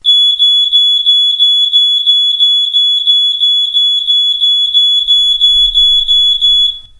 smoke alarm piep piep
little smoke alert.
Recorded with Rode NT-1a